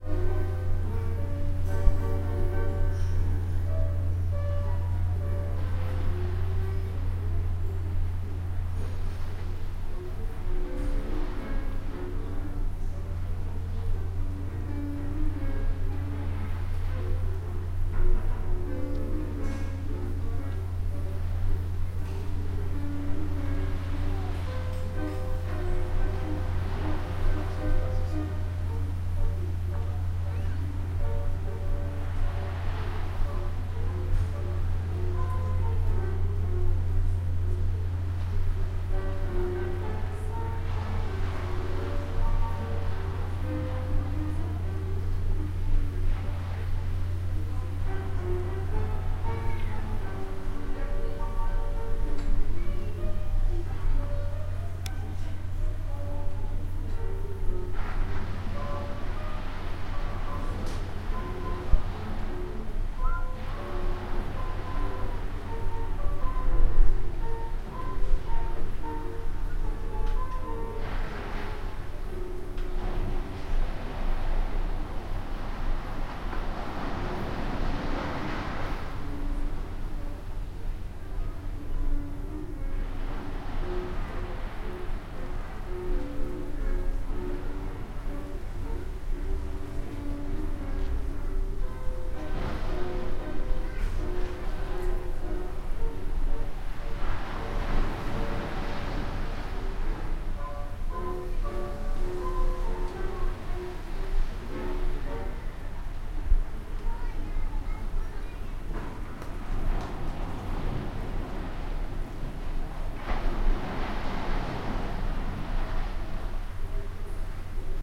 Hotel do Mar 2012-2
Hotel do Mar,Sesimbra, Portugal 19-Aug-2012 22:46, recorded with a Zoom H1, internal mic with standard windscreen.
Ambiance recording.
My room had a balcony next to the hotel bar. There is the sound of live piano music, people in the bar balcony, sound of glasses and the seawaves on the beach below.
For this recording I had just repositioned the mic to capture the sound of a boat approaching the beach. The humm of the engine can be heard during the first half of this recording.
Close to the end of the recording the piano music ends. Although there were several people in the bar, only one person claps briefly. How sad...